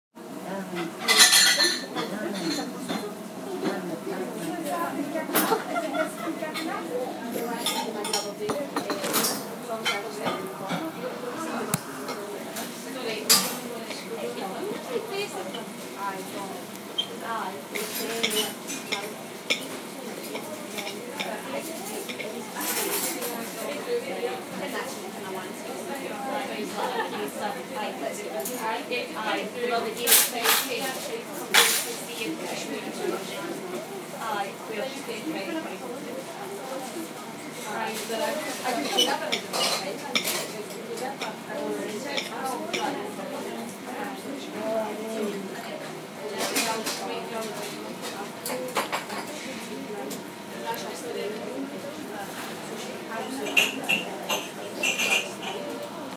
cafe 20150326 edit
Recorded in a café in North Ayrshire Scotland, using an iPhone6 and the Recorder pro app. Used the microphone on the iPhone6.
caf, chat, crockery, cutlery, dishes, eating, people, phone, restaurant, staff, talking